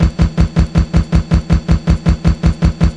Even more loops made with software synth and drum machine and mastered in cool edit. Tempo and instrument indicated in file name and or tags. Some are perfectly edited and some are not.